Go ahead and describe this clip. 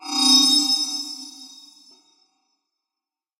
Shimmer sound created by synth